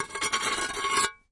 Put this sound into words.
Small glass plates being scraped against each other. Rough and scratchy, grating sound. Close miked with Rode NT-5s in X-Y configuration. Trimmed, DC removed, and normalized to -6 dB.
glass, noisy